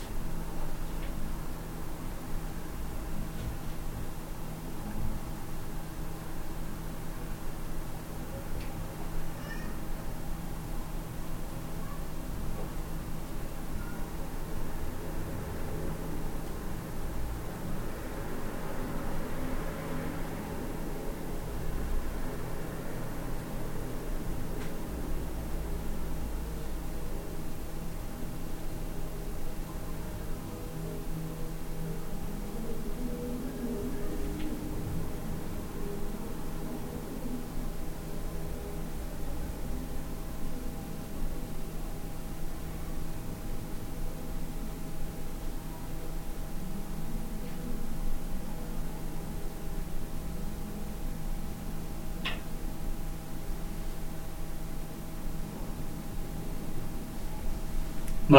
indoors dorm dormitory ambient room tone distant traffic in street 3
ambient, indoors, dorm, dormitory, tone, distant, room, traffic